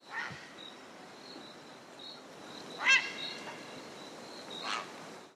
Vautour-Cri
A vulture in Tanzania recorded on DAT (Tascam DAP-1) with a Sennheiser ME66 by G de Courtivron.
africa, tanzania, vulture